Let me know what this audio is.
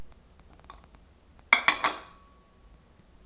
Low quality sound of a teacup being placed on a saucer
bang, physical, teacup